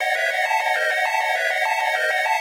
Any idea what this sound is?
FL studio 12
VSTI: 3x Osc-Loop1
Tone: G#6+F#6+G#6+B6
Tempo: 100
Soundgoodizer: D
Stereo Shaper: Stereoize
FL Flangus: Modulation
FL Filter:Tiny Speakers